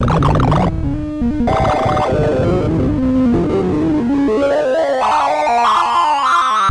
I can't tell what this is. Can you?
Sound demonstration of the Quick Sort algorithm (slowed) with an array of 100 components.